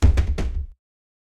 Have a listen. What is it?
A sound which may have been sourced from dropping heavy boots. Tiny bit of mixed low-shelf equalization was applied to make it seem more heavy.
clumsy, fall, ground